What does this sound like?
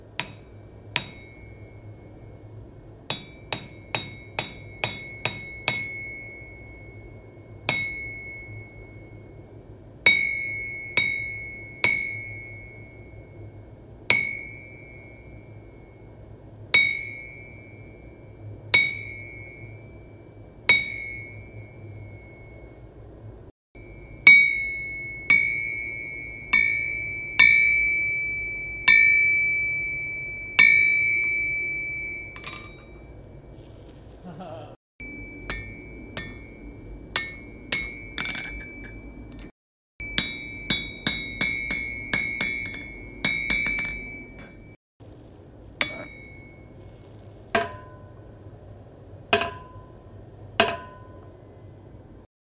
Hammering anvil
Hammering on anvils and metal pieces, some metal pieces were resonating as well. Recorded using the Røde ntg3 mic and onboard mics of the Roland r26 recorder.
industrial, hammer, blacksmith, hammering